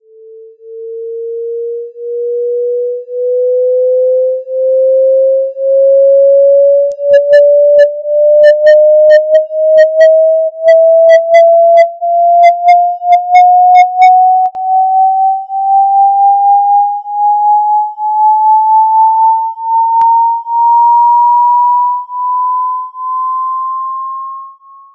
I've generated a whistle effect who start at 440Hz and ends at 1320Hz with logarithmic interpolation, I applieda "phaser" effect, followed by a fade-in.A wahwah effect from 7 to 15 seconds, a tremolo effect from 15 to 20 seconds and finely a fade-out starting at 21 seconds and ending at 25 seconds.